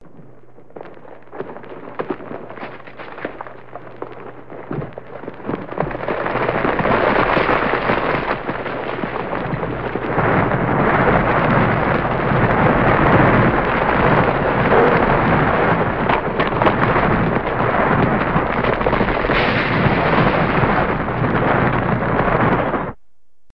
Pouring Gravel
Sound of gravel falling. Starts slow then increases in velocity and sound level.